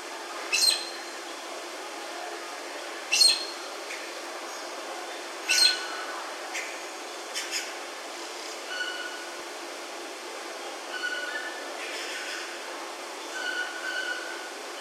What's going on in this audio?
High-pitched calls from a Black-necked Aracari, with other birds including a toucan in the background. Recorded with a Zoom H2.